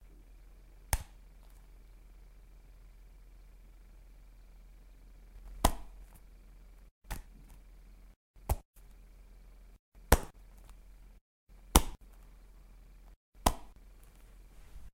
Lemon Catch
bar, Catch, Lemon